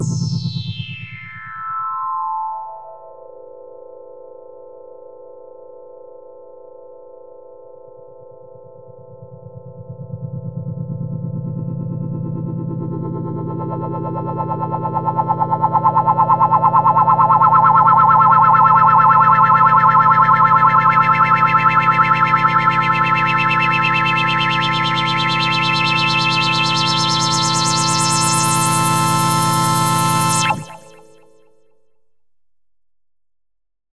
This is part of a soundesign work for the new memorymoon vsti that emulate the legendary Memory Moog synthesizer! Released after 15 moths of development by Gunnar Ekornås, already known for the amazing work on the Arppe2600va and Minimogue as member of Voltkitchen crew.
The pack consist in a small selection of patches from a new bank of presets called "moon mobile bank", that will be available as factory presets in the next update ..so take it just like a little tease.
This sound is modulated by LFO and you will notice the filter cutoff opening slowly until the end. Onboard effects, no additional processing.